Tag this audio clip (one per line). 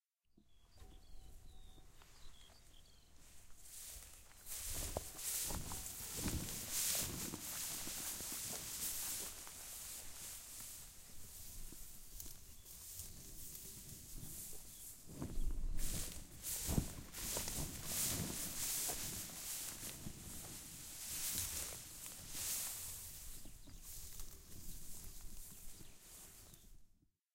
stero
field
nature
recording
footsteps
grass
ambience